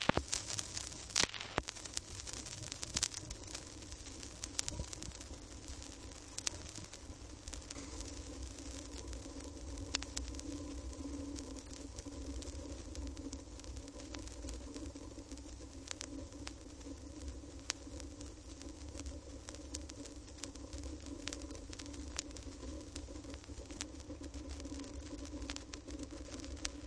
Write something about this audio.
Authentic vinyl noise taken from silence between tracks off an old LP.